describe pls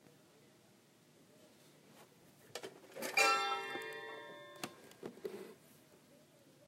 Piano Keys Vibration 3
I recorded the vibrations coming from an old toy piano after hitting the keys.
Ghost, Piano-vibrations, Creepy, Scary, Piano-Keys, Spooky, vibrations, Piano, frightening, Eerie, Keys, Sinister, Horror